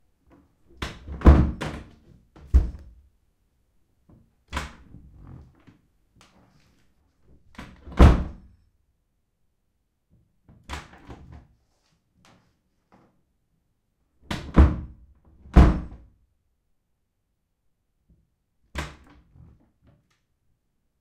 Door Hard To Close
This door is hard to close, so I ram it extra hard into the lock. 3 takes. Comes with door opening too.
Recorded with Zoom H2. Edited with Audacity.
locking, house, entrance, living-room, close, wood, wooden, lock, domestic, door, closing